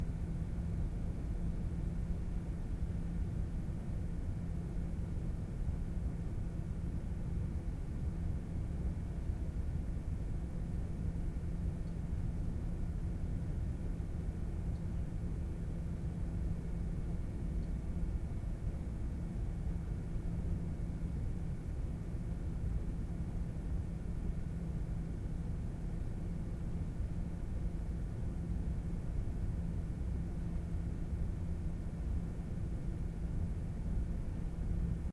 Sound take from my computer fan, witch does like air-conditioning.